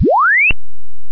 Simple jump effect, ascending pitch